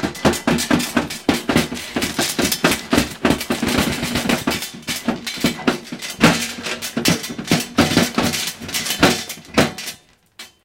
Sounds For Earthquakes - Pans Metal
I'm shaking some metal cooking pans. Recorded with Edirol R-1 & Sennheiser ME66.
suspense, metallic, shake, moving, stutter, movement, quake, waggle, shaked, kitchen, falling, motion, earthquake, rattle, shaking, collapsing, rumble, shudder, metal, noise, rumbling, stirred, iron, rattling, pan, collapse, pans, earth